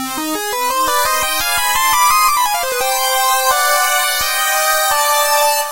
some kinda intro or fill for a fast pace track. i don't know what you'll do with it.
harmony, synth